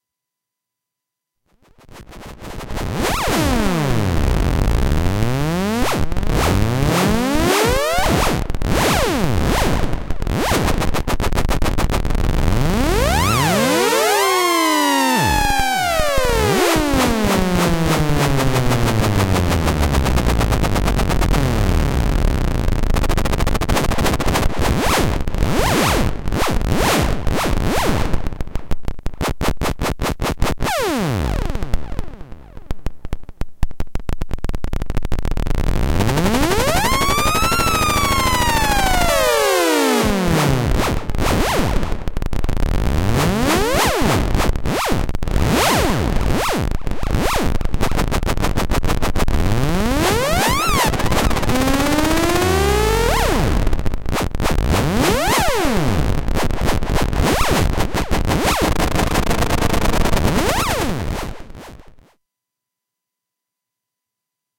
Sound made with modular synth, left and right are different. Sound is random created.
noise, modular, analog, synthesizer, synth